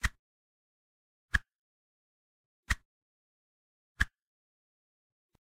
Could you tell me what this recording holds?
Sonido de la fricción del aire al mover un tubo delgado contra el aire
fricci, n, Tubo, viento